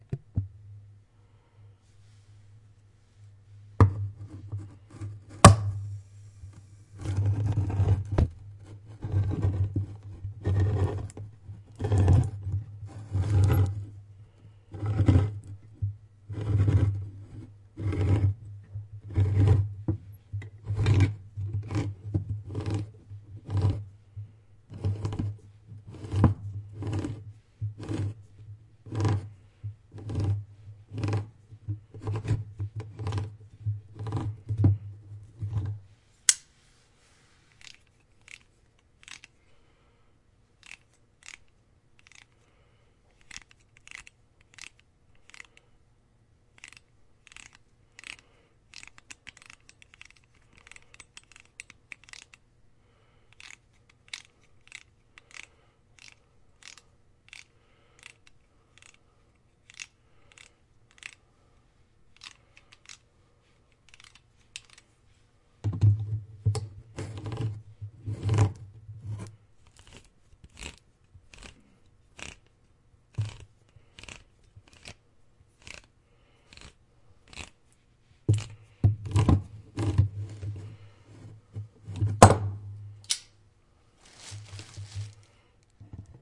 Can Opener
Can Openr opening can of green beans on Countertop
field-recording, Can, Opener